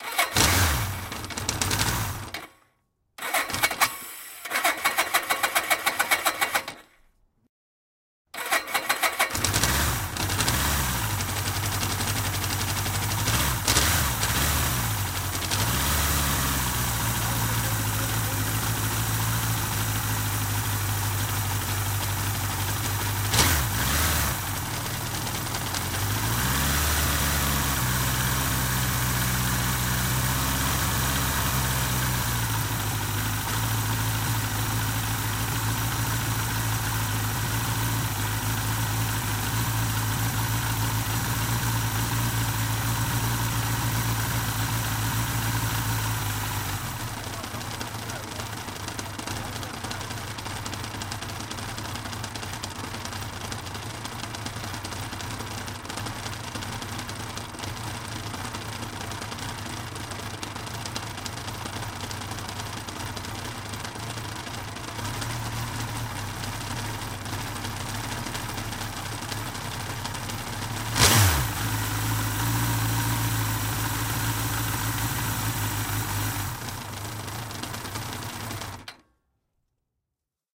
delphis SUZUKI GSX 1100E-1
Cold Start Suzuki GSX 1100e engine sounds while the engine was cold.
1100e, gsx, coldstart, suzuki